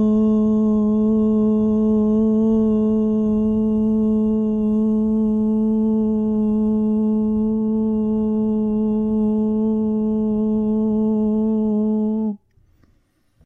GdlV Voice 5: A3
Unprocessed male voice, recorded with a Yeti Blue